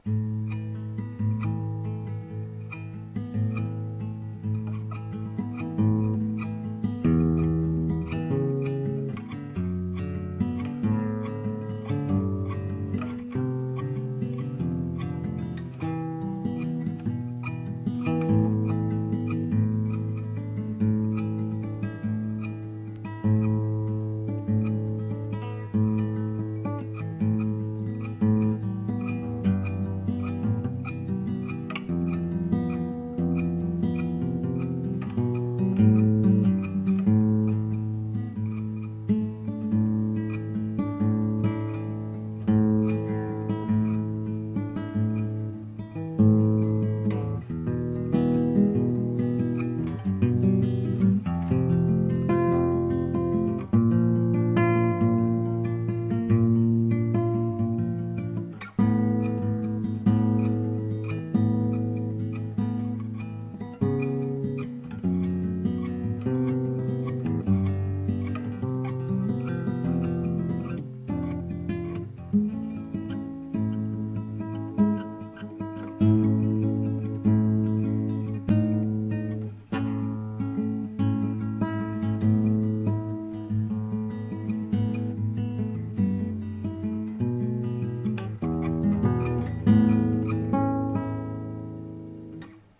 Yamaha C-40 nylon string acoustic.
guitar, nylon-guitar, acoustic